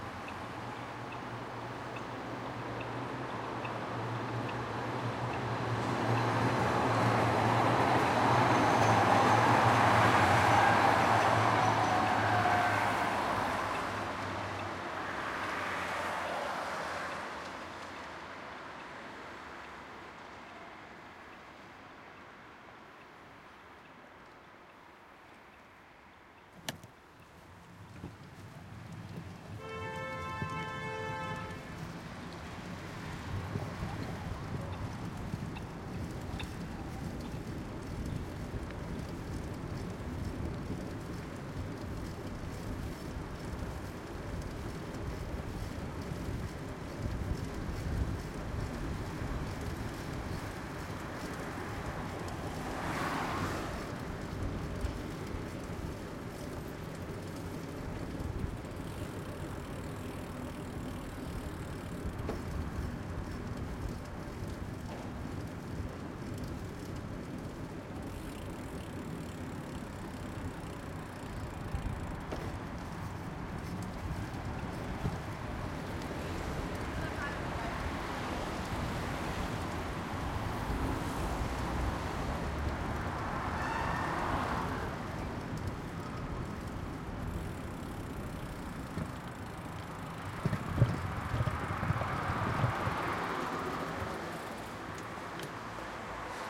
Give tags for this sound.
bicycle
bike
cars
chain
city
clank
cycle
field-recording
gear
honk
horn
mechanic
noisy
pedaling
rail
ride
street
surround
traffic
tram
wind

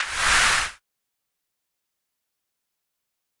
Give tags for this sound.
glitch
click-hit
experimental

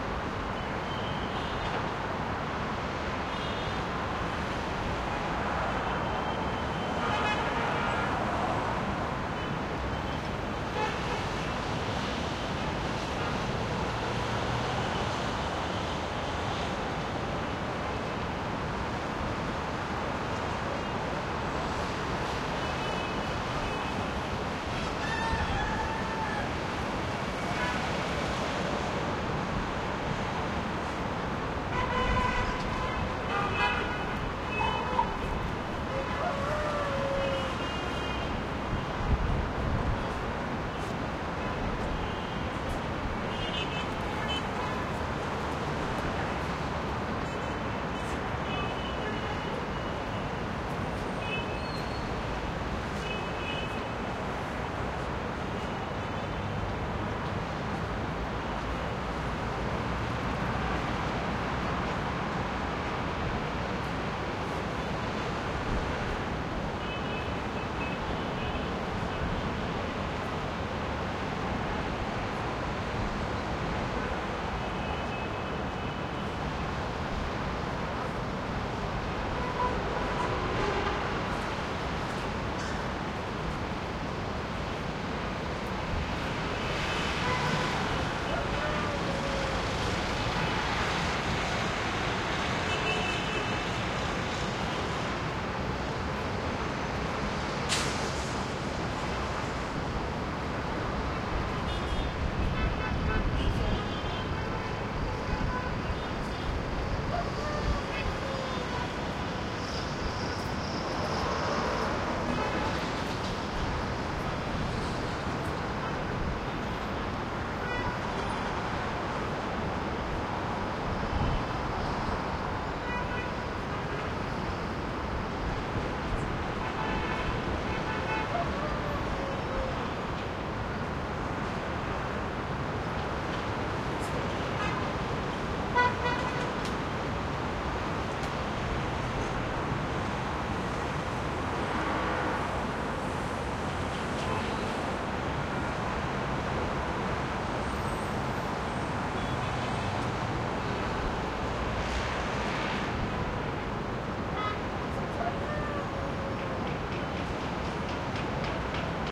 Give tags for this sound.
echo
distant
horn
East
Middle
haze
honks
skyline
traffic

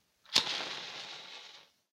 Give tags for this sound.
flame
fire
lighter

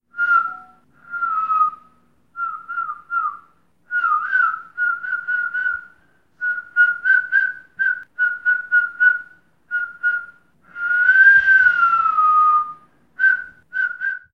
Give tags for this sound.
Animals
Aves
Birds

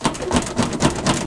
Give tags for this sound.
Essen
Germany
School
SonicSnaps

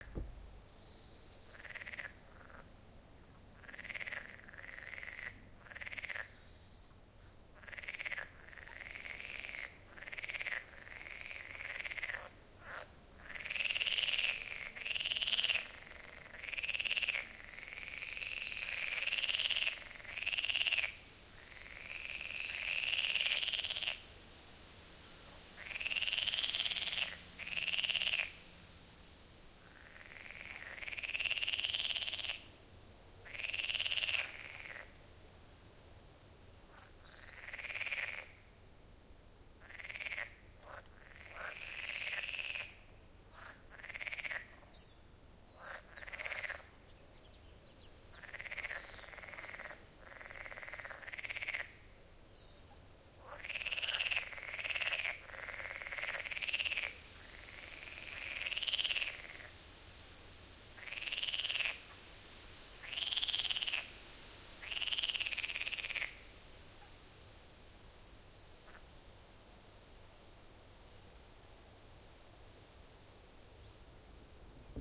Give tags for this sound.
pond
insects

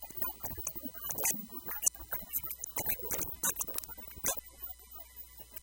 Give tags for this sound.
broken-toy
music
noise
digital
circuit-bending
micro
speak-and-spell

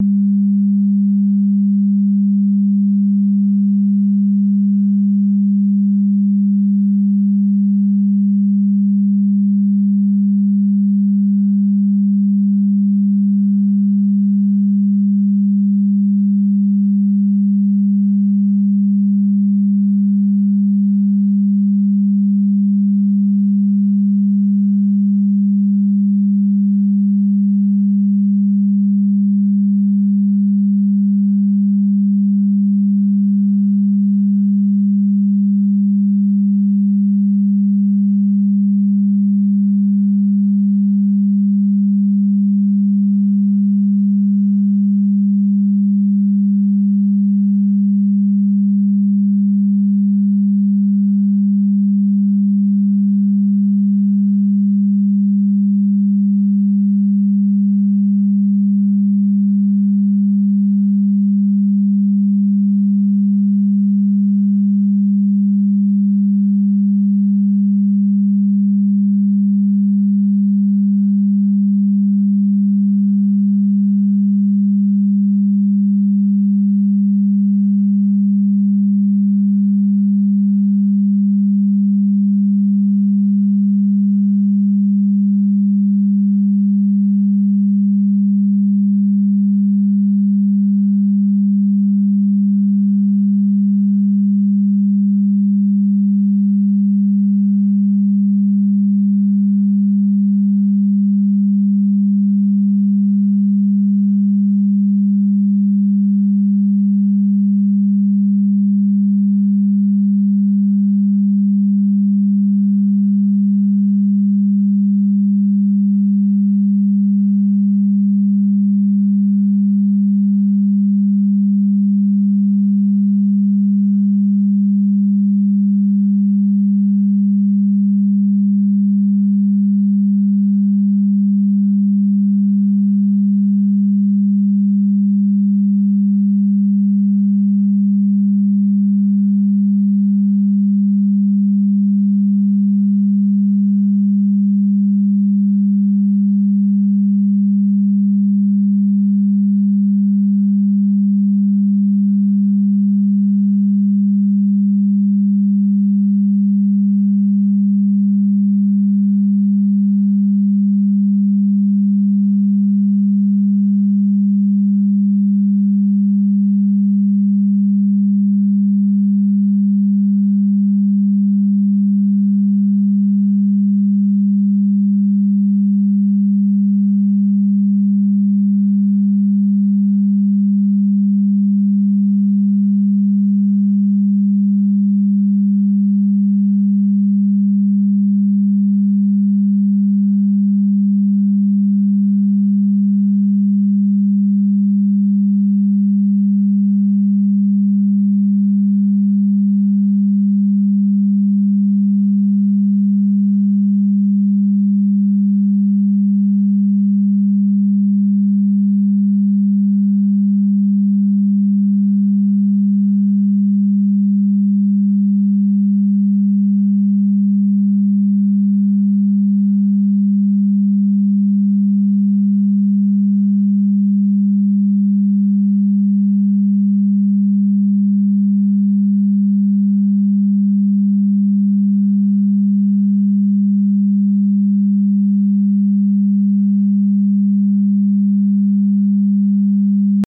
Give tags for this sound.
electric
sound
synthetic

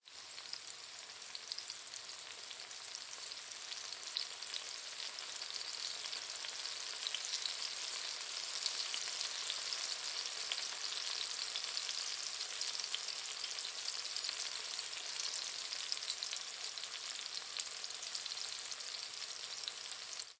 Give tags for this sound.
Fire
Pan
Kitchen
Frying
Cooking
Heat
Noise
Stereo
Rain
Sound
Water

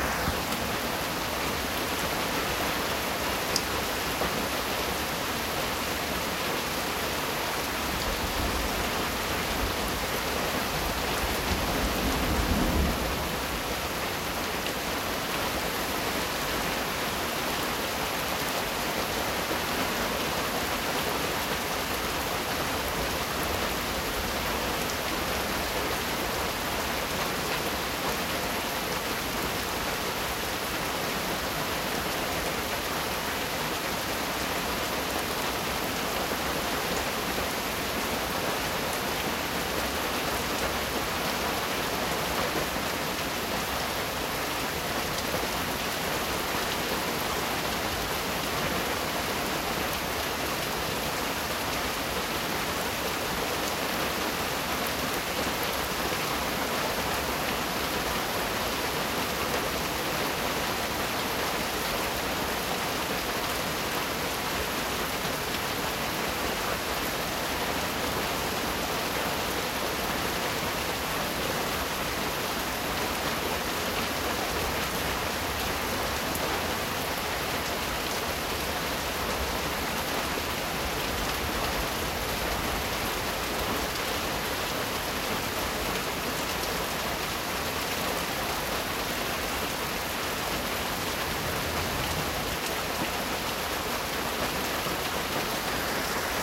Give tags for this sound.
nature
storm
rainfall
weather
rain
looping
seamless
field-recording

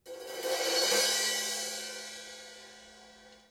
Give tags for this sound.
DD2012
drums

